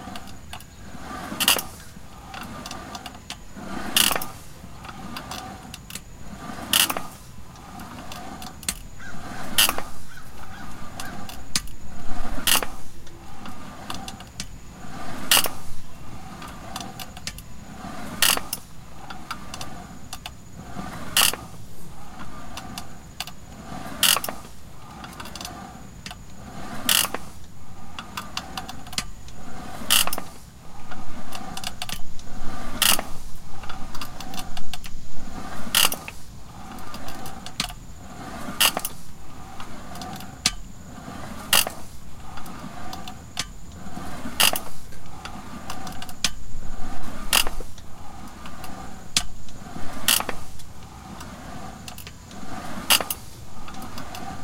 Rowing Machine With Hawk
From a session on the rowing machine outside with a Hawk in the backround.